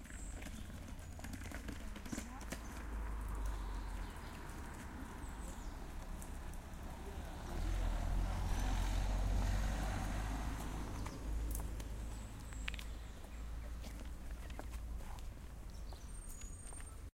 SonicSnaps HD Jordan Traffic
This is a sonic snap of traffic recorded by Jordan at Humphry Davy School Penzance
cityrings, humphry-davy, jordan, sonicsnap, traffic, UK